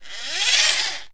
toy car rolling on floor. Recorded in studio near the toy, faster